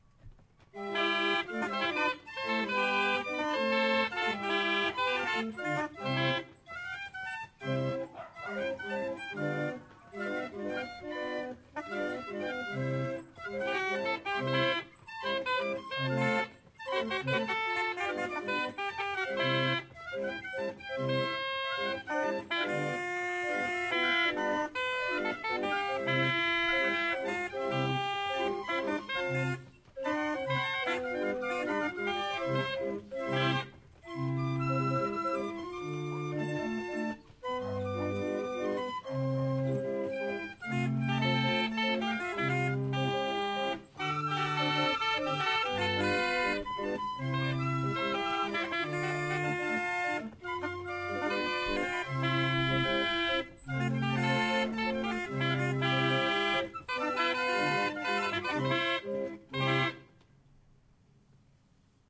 This is a very old and particular instrument named "Organillo" playing a milonga tune.
music, old-instrument